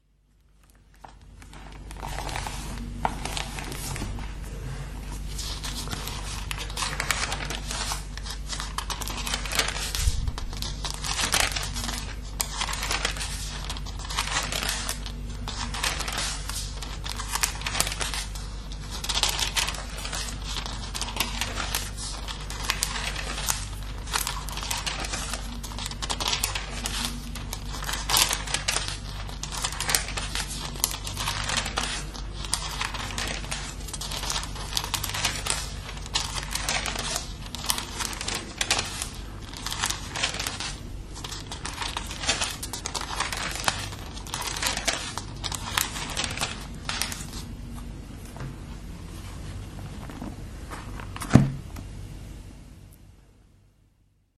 Turning the pages of the book Exodus in the bible (dutch translation) the church has given my father in 1942. A few years later my father lost his religion. I haven't found it yet.
book, paper, turning-pages